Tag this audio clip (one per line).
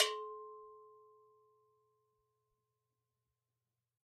bell
double-bell
ghana
gogo
metalic
percussion